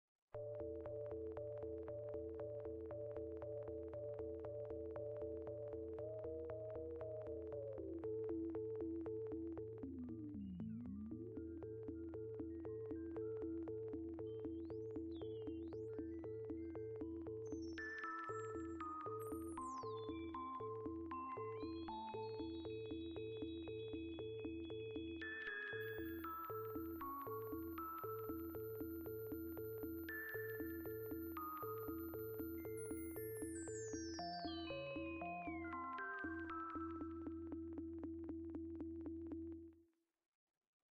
Spooky Radar
A little diddy about Jack the ripper and a Diabolical computer. Good little intro bit for a suspenseful scifi movie, maybe?
It's all yours world.